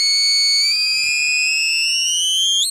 A Casio CZ-101, abused to produce interesting sounding sounds and noises